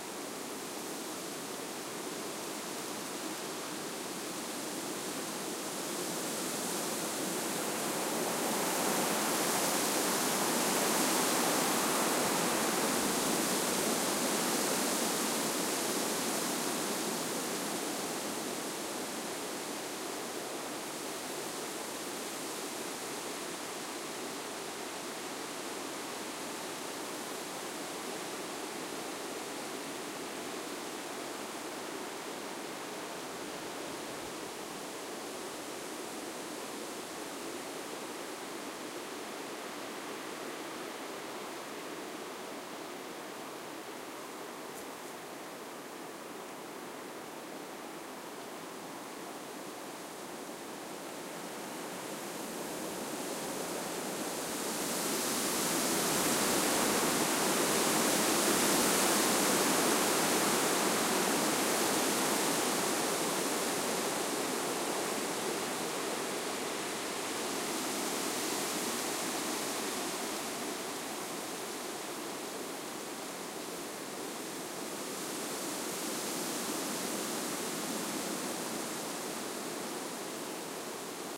wind forest 08 strong l 01
A looped recording of wind blowing through a landscape with trees
Recorded on a summer afternoon using Zoom H4n, at Rosendal, Nerikes Kil, Sweden.